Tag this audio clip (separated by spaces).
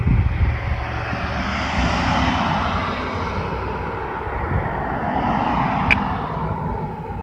highway traffic car noise